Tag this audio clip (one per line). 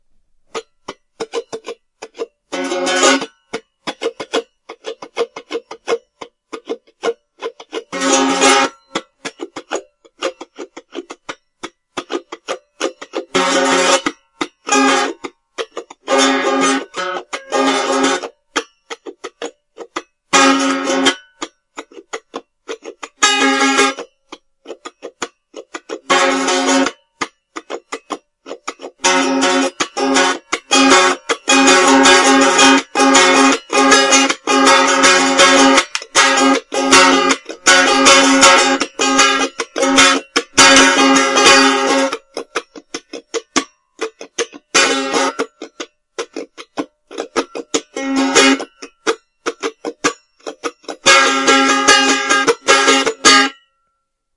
palm-muted
noise
out-of-tune
mandolin